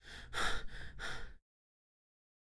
Suspiro Agitado
agitated sigh sound